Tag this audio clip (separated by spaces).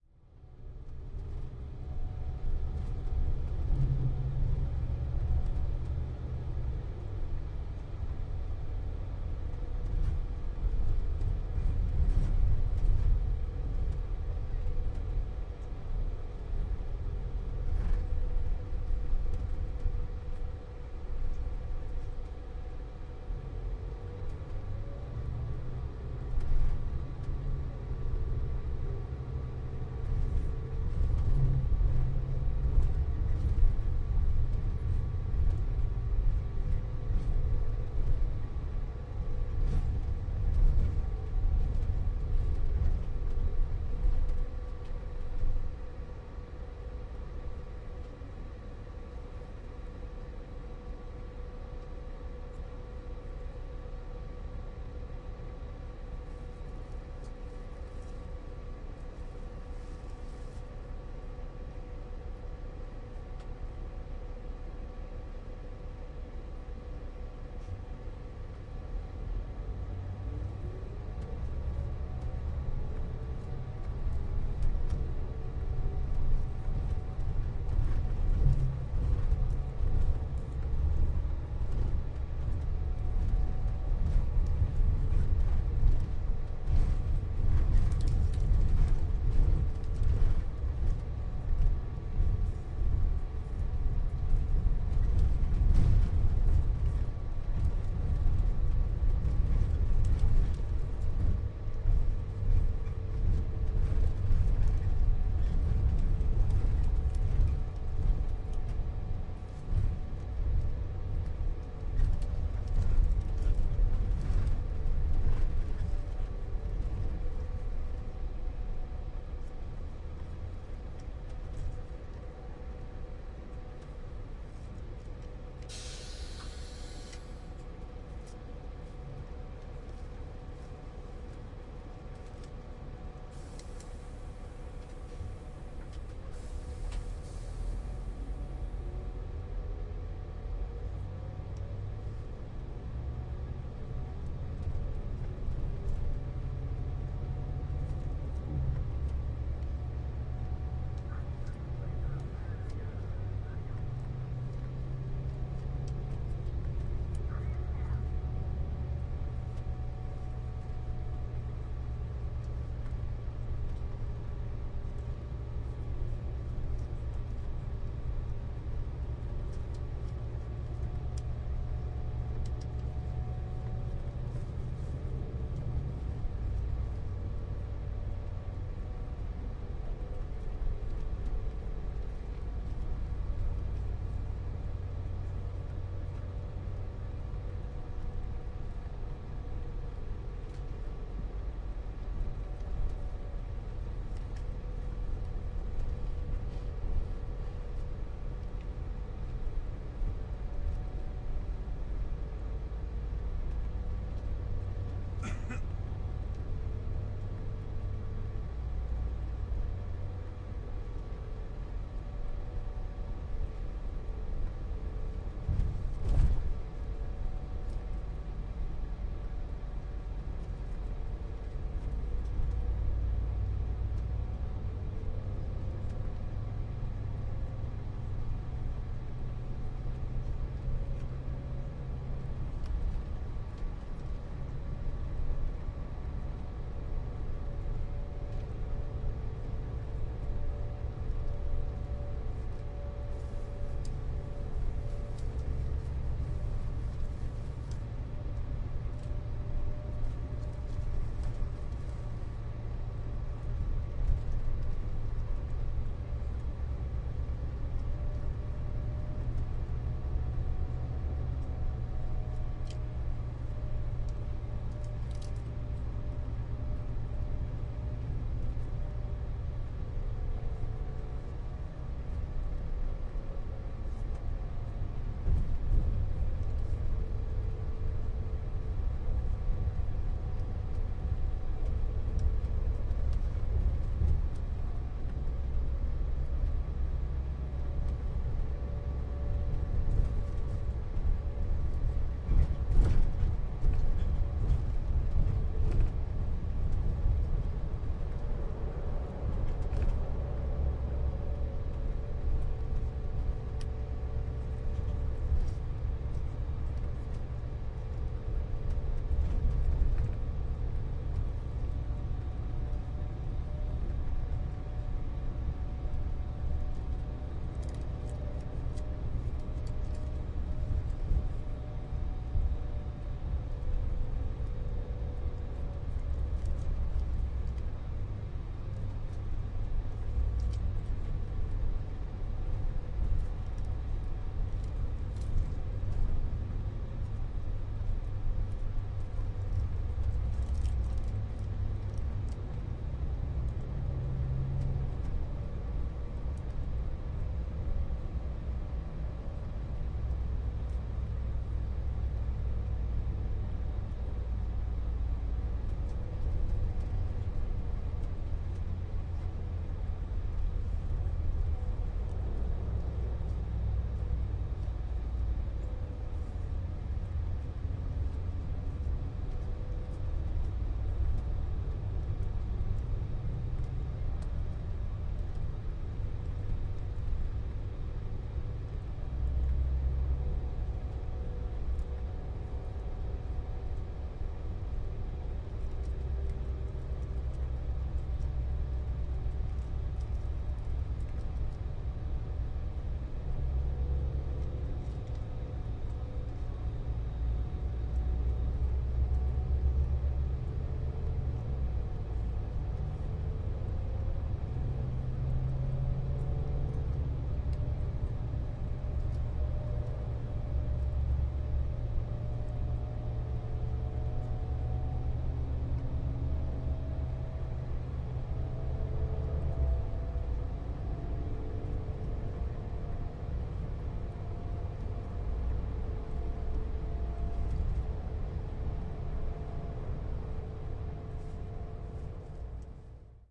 surround quad